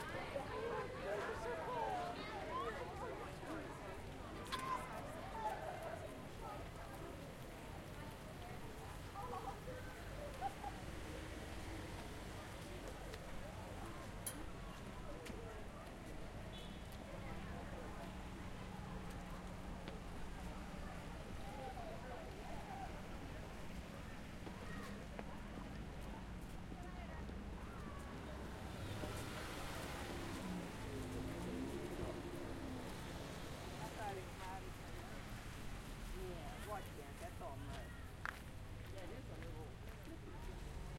Middle School Exterior Ambience (Nighttime)
A field recording of the outside of a middle school after a concert in the evening. People talking, cars pulling out, etc. Recorded with the Zoom H4N.
school, night